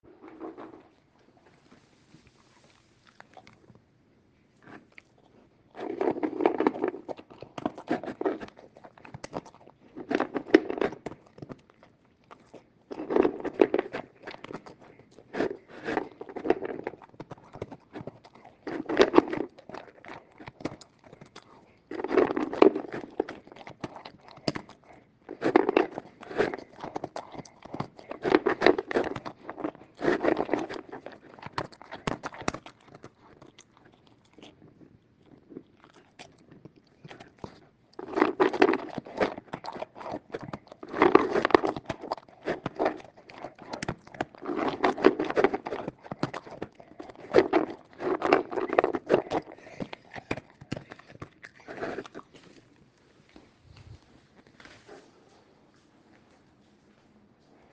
Content warning
german shepherd eating dog food